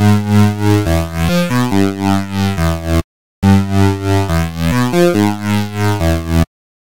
dubstep synth that oscillates every third beat at 140bpm. to be used with bass wobble from this pack.